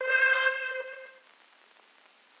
100 Lofi Defy Tonal Melody 01
Lofi Defy tonal melody 1
remix, Destruction, 100BPM, Defy